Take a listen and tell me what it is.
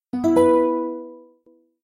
Highlight - HarpEPianoEdit02
made with mda Piano & VS Etherealwinds Harp VSTs in Cubase
UI, VST